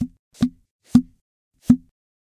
Open Tube
Opening a diploma tube